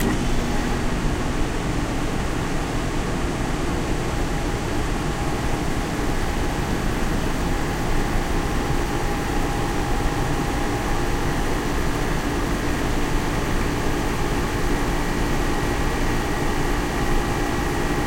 My window air-conditioner on the cool setting. Fan is running while the compressor switches on. Please use in conjunction with the other samples in this pack. Recorded on Yeti USB microphone on the stereo setting. Microphone was placed about 6 inches from the unit, right below the top vents where the air comes out. Some very low frequency rumble was attenuated slightly.